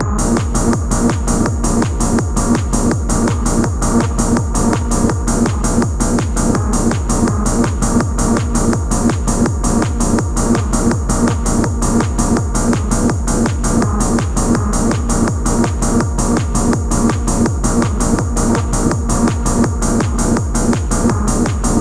HARD TECHNO BY KRIS DEMO V2
hi did this my sefe on ableton live and made the kick drum from scratch hope u like it :)
bass, bassline, beat, clap, club, dance, hard, hard-bass, hardbeat, hardcore, hard-dance, harder-bass, hard-rave, hard-techno, loop, night-club, rave, techno, tune